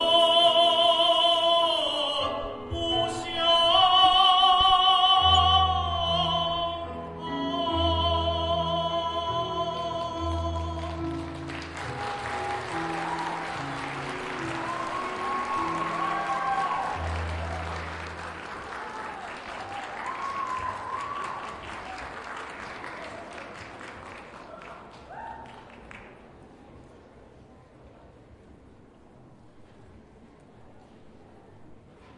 opera with audience
Don Giovanni begun in Setnor Auditorium with a full audience
audience, orchestra, opera, music